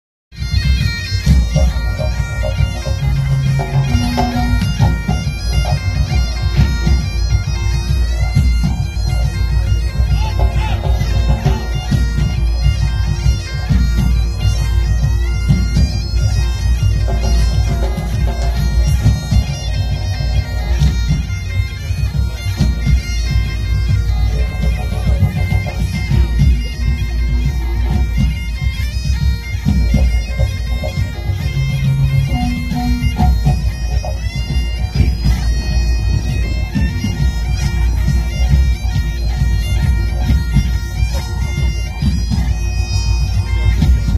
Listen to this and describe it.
Bagpipers playing at an outdoor Irish festival
St-Patricks-Day, Bagpipes, music